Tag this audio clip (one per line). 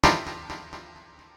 sound
effect
game